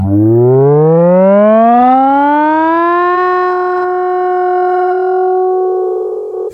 Mangled snippet from my "ME 1974" sound. Processed with cool edit 96. Step three, stretched some more.